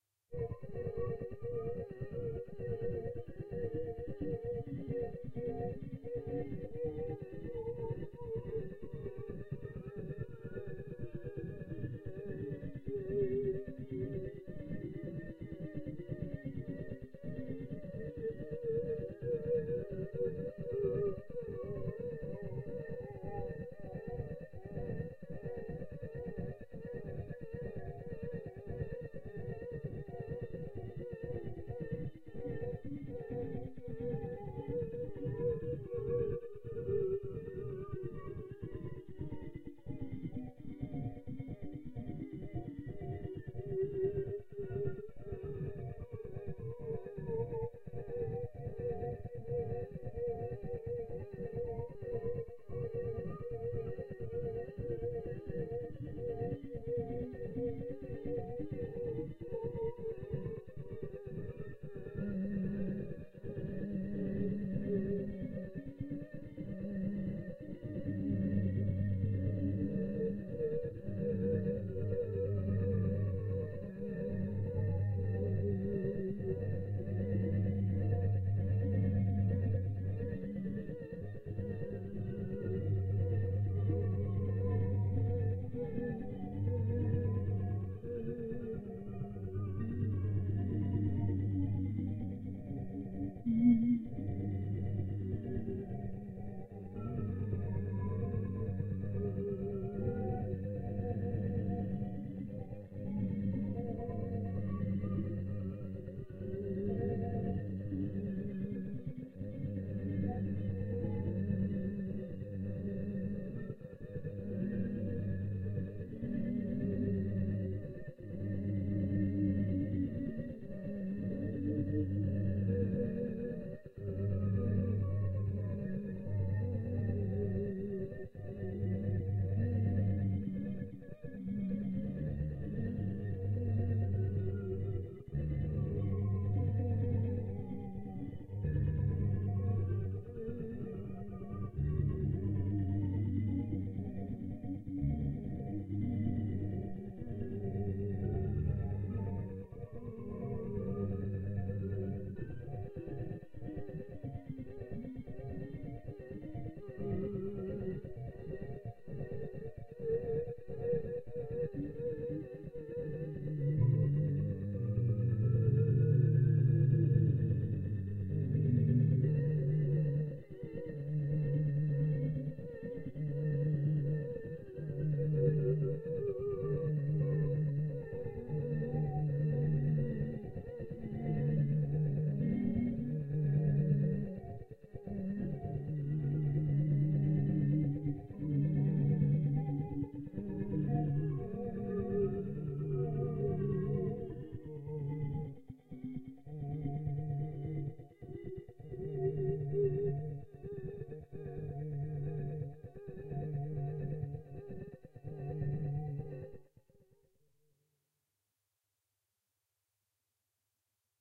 Electronic voice stutter
Stuttering electronic voice with a lot of sibilants.
Made with Clavia Nord Modular.
electronic, synthesized-voice, texture, vocaloid